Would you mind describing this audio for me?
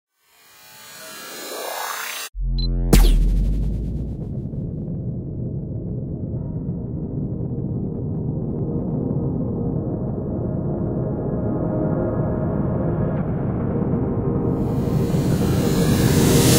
The spaceship launches into a lengthy warp speed, accompanied by a synth piece.